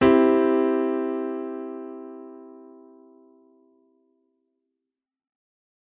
C - Piano Chord
C Major piano chord recorded with a Yamaha YPG-235.
electric, chord, piano, Yamaha, keys, keyboard, YPG-235